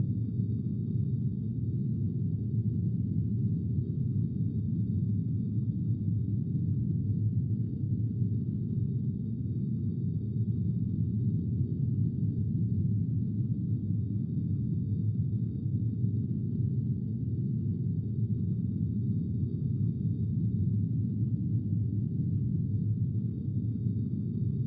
FTZ GC 103 SS03
Intended for game creation: sounds of bigger and smaller spaceships and other noises very common in airless space.
This one is very deep and airy. With the small speakers of my notebook I couldnn't hear it at all
How I made them:
Rubbing different things on different surfaces in front of 2 x AKG C1000S, then processing them with the free Kjearhus plugins and some guitaramp simulators.